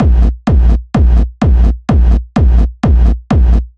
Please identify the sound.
Propellerheads Reason
rv7000
3 or 4 channels, one default kick, others with reverb or other fx.
bassdrum, fx, gate, hard, heavy, kick, loop, processed, reverb, rv7000, techno